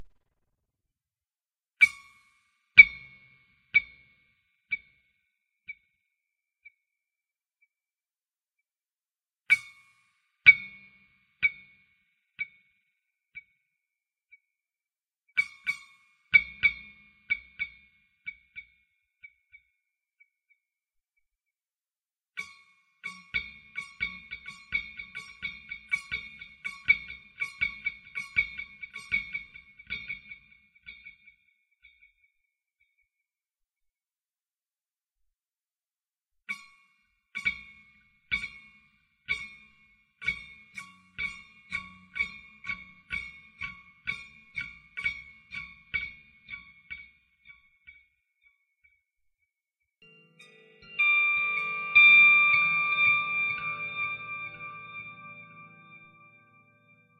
Creepy Guitar-Long Delay
Some spooky sound effects created by using my guitar and a vintage analog delay unit. Long delay.